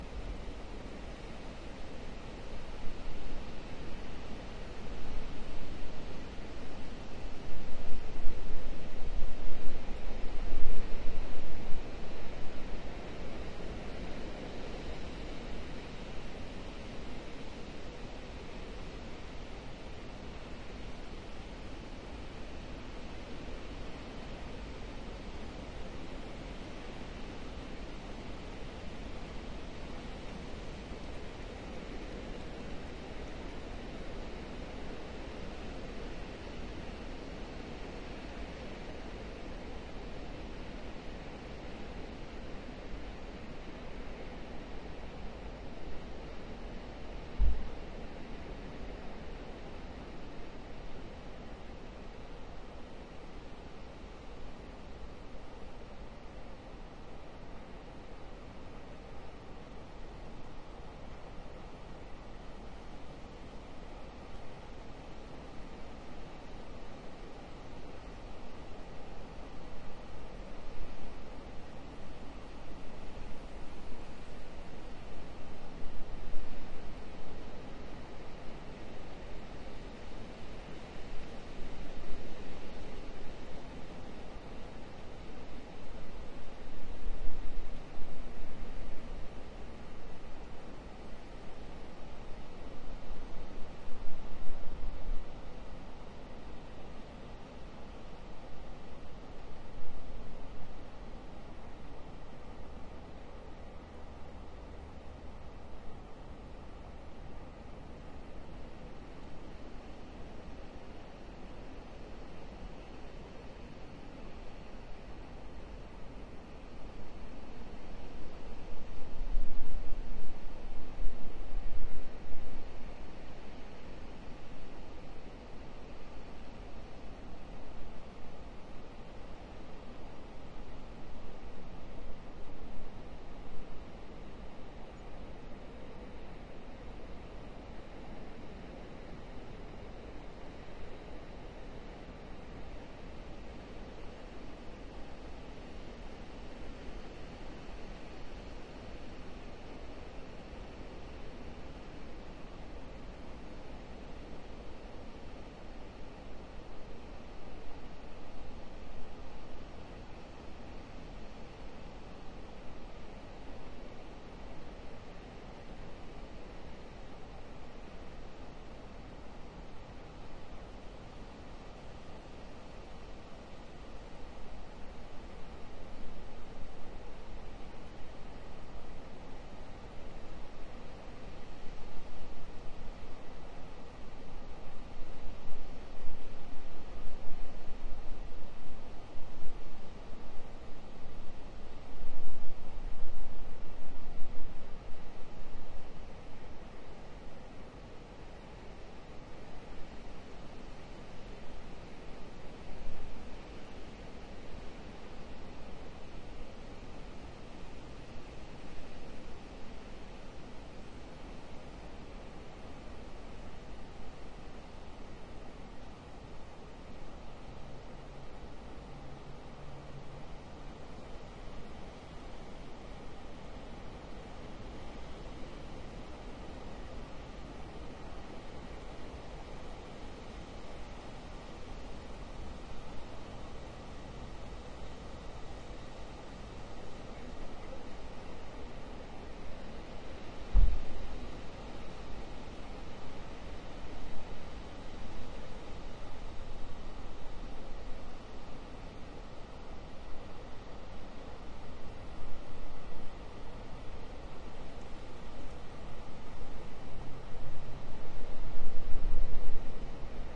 wind at night
Windy night in Scotland. There isn´t much else to hear but the wind. EM 172 microphones, FEL preamp into PCM-D50 recorder.
wind, scotland, field-recording, spooky, night